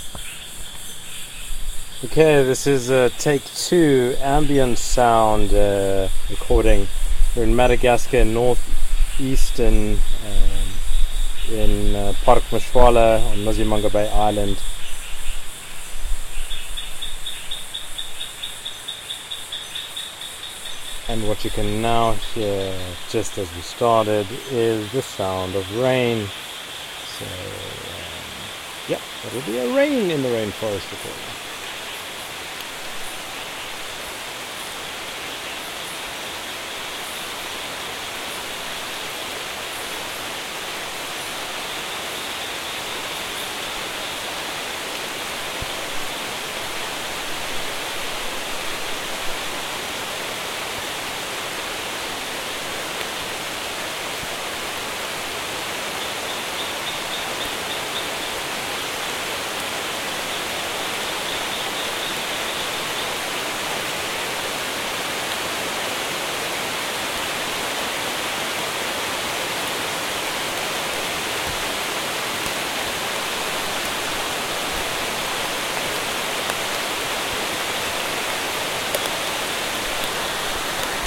Madagascar Forest
Recording of ambient sounds in Madagascar (Masoala). Just started recording and the rain started.
exotic, tropical, rainforest, birds, rain, madagascar, field-recording